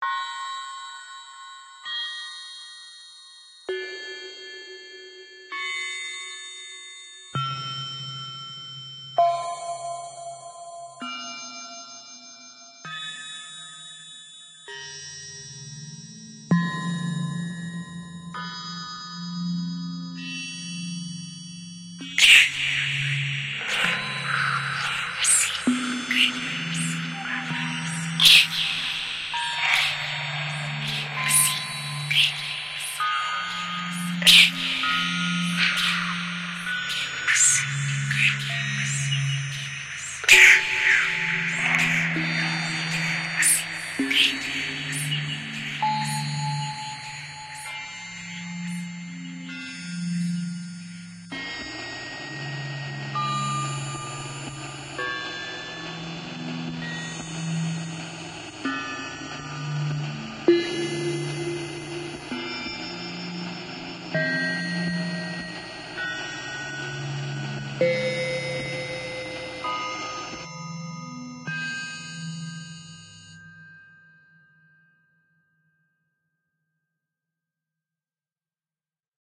a horror game menu ambience i created using fl studio.